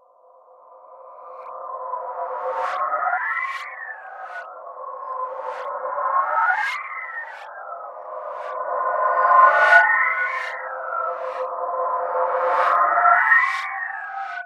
I started out with the DTMF tones of my home phone number and ended up with this.processing: Doppler, reverb, reverse.
processed, doppler, space, dtmf